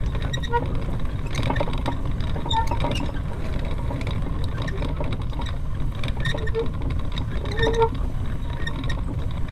Foley Mechanism Wheel Moderate Rusty Loop Mono
Edited Sound of a Close Recording of an old Coffee Grinder (Loop). Useful for wheel mechanism in video game.
Gear : Rode NTG4+
close, coffee, creak, creaky, foley, griding, grinder, loop, mechanism, moderate, rodeNTG4, rusty, squeak, squeaking, squeaky, turning, wheel, zoomH5